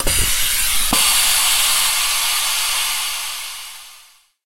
airlock 1 3 sec mast
Air lock sample-note that there is a gap between the first and second sample- this will allow you to use it with any animations
pressure, airlock, air